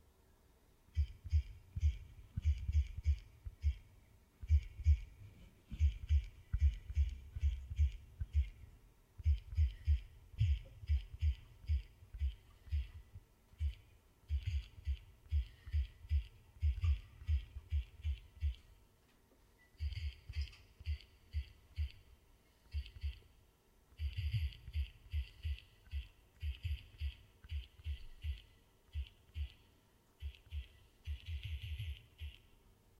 smartphone typing message on touch screen with haptic vibration
Typing text on smartphone with haptic feedback sound.
smartphone, touchscreen, typing, vibration